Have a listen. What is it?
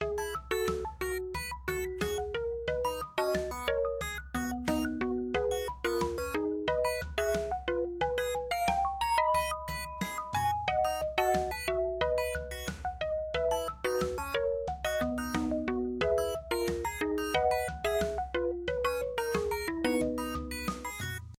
Arcade Game Loop
A simple loop for an arcade game.
If requested: I can make some modifications, including adding a winning/losing measure or two. Or I can make this available with alternative licensing on a case-by-case basis.
I couldn't figure out how to export this as a perfectly timed loop from GarageBand: it always added a few seconds of (near) silence as the sounds faded away. Does anyone know how to do this? I ended up manually chopping off the unwanted (near) silence in Audacity, but it was hard to do an imperfect. ("There must be an easier way!")
arcade-game, loop, arcade-game-loop